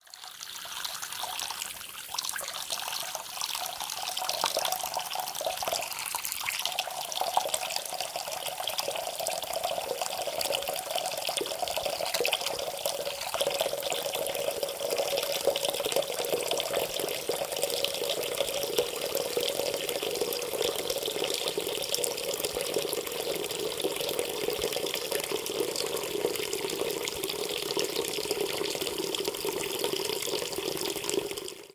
Filling sink with water from water faucet. Close recording.
Bathroom, Field-recording, Filling, Sink, Water